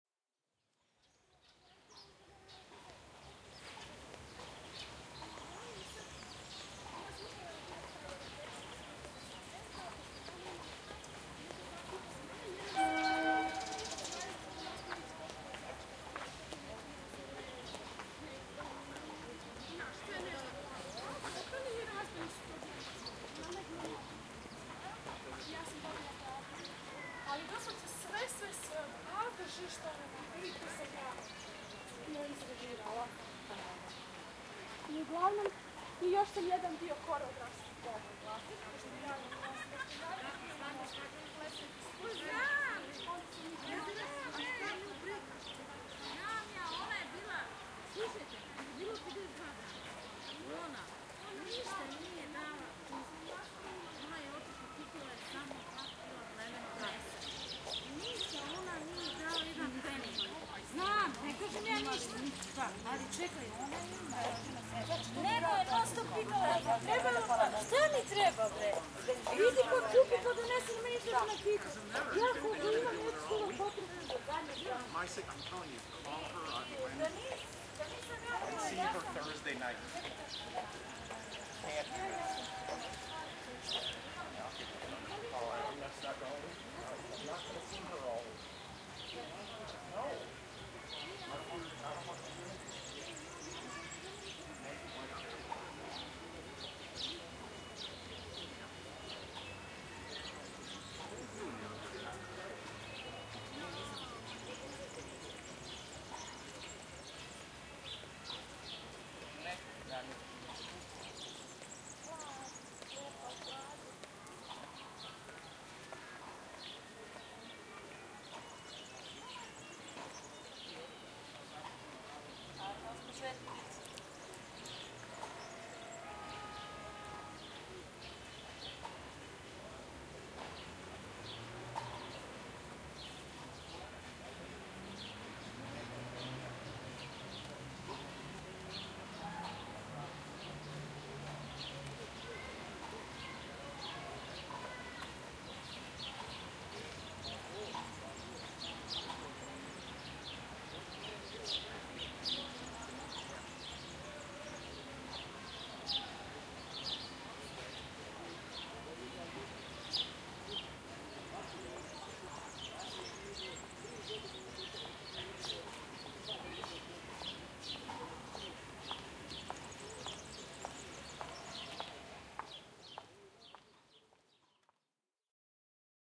amb park-birds01-belgrade
Field recording of a park, with birds singing, background ambiance, people talking and walking by. Recorded with Zoom H4n, 2010.
field-recording, ambiance, people, city, park, birds